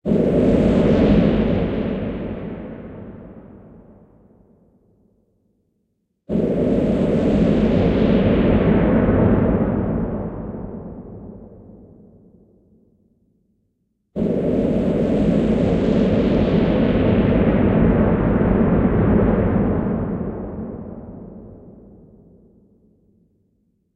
Bubbles to Noise #6
A transition from a "bubbly" sound to noise, with a big reverb. Created using Logic synth Hybrid Morph.
Transition, Noise, Hybrid-Morph, Space, Electronic, Futuristic-Machines, Bubbles, Sci-fi